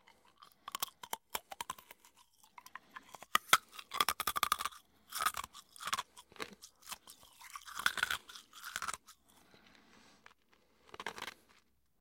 Ice cube - Munching
A crazy guy munching an ice cube...
Interior recording - Mono.
Recorded in 2003.